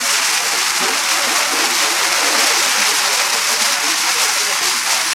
Burning fuse sound recorded by me.
Cannon-Fuse, Fireworks, Fuse